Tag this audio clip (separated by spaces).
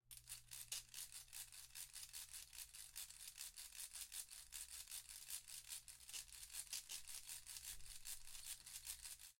cycle rider bike